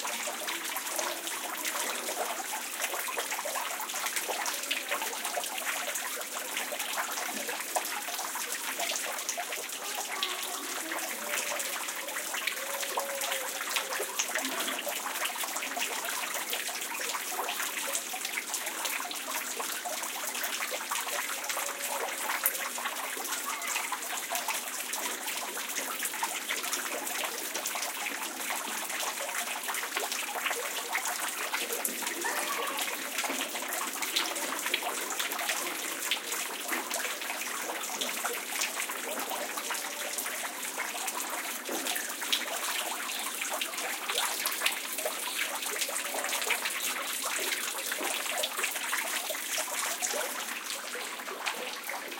fuente.patio
sound of water falling in a silent courtyard. OKM Soundman > iRiver iHP120 /sonido de fuente en un patio silencioso